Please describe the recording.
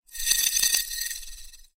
Coins in a jar (sped up)
coins shaking in a jar sped up
jar, up, sped